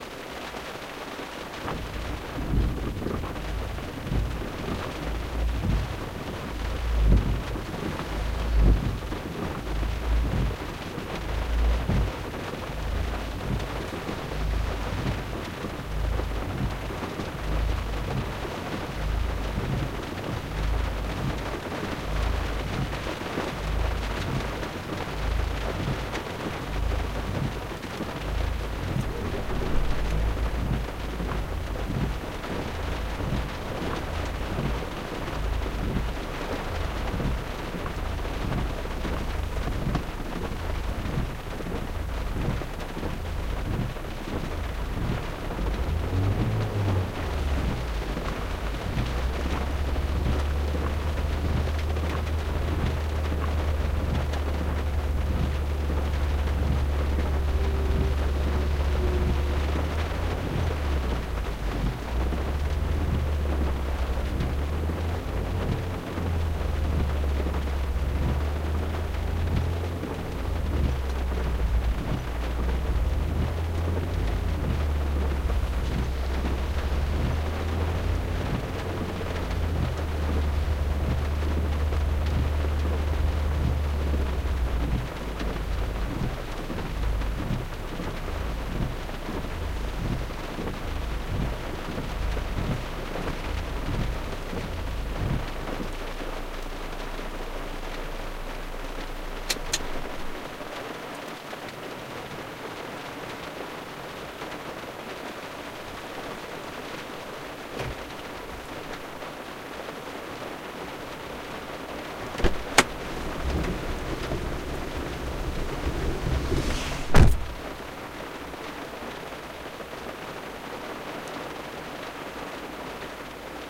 car: driving a car while it is raining. You can hear the windows wipers and the motor.
automobile, car, drive, driving, motor, rain, raining, traffic, vehicle, wiper